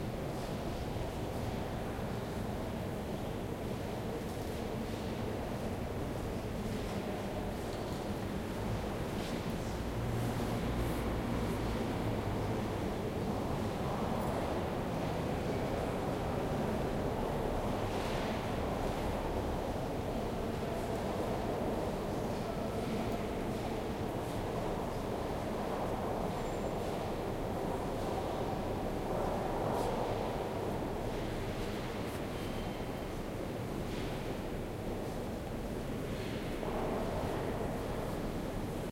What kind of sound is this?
Saint Sulpice Paris
Church in Paris in which part of Dan Browns book Da Vinci Code is situated.